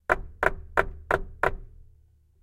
Wood Knocks
Knocking on wood. Recorded in Stereo (XY) with Rode NT4 in Zoom H4.
block, door, knocking, knocks, plank, rolling, square, wood, woodblock